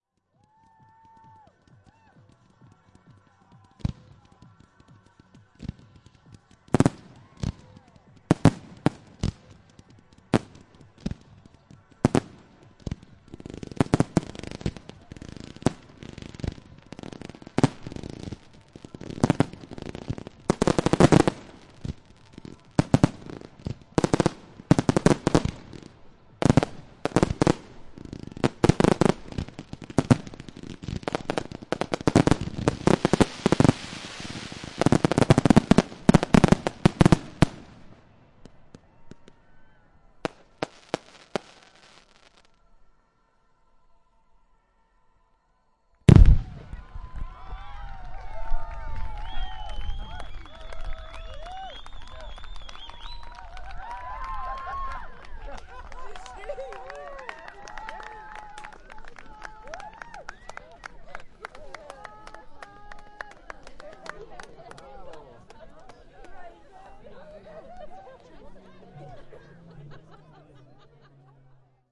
Winter Festival Fireworks
A local fireworks display. recorded on a Zoom H4.